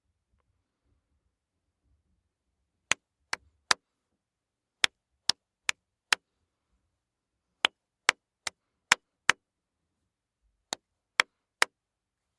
Claps04 FF051
1 person clapping, rhythmic, hollow sound, medium tempo, low energy
claps, clap-variations